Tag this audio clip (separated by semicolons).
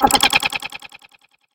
sound-effect; boing